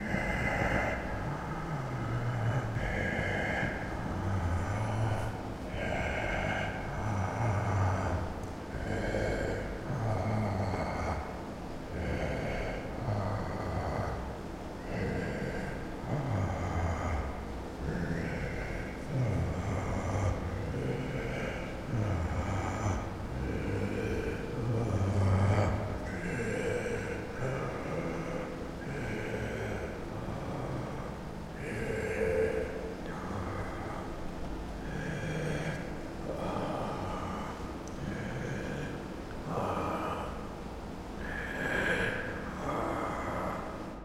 Killer Breathing 2
Breathing, Creepy, Horror